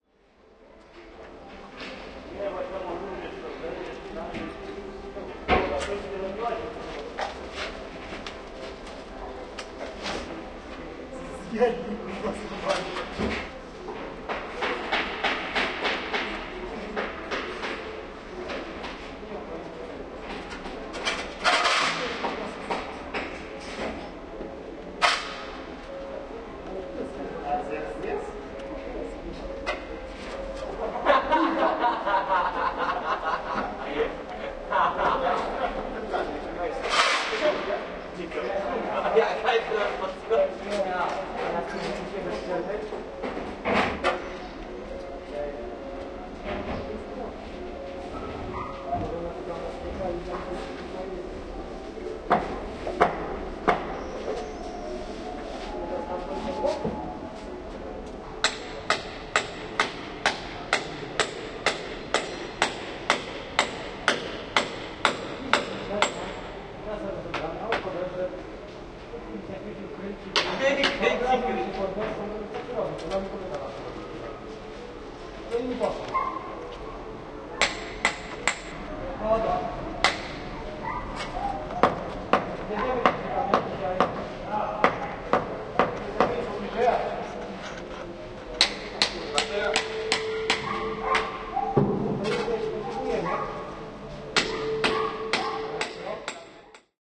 08.05.2013: about 15.00. An old tenement courtyard on Gorna Wilda st in Poznan. Sounds produced by workers.
marantz pmd661 + shure vp88
tenement, poznan, poland, echo, courtyard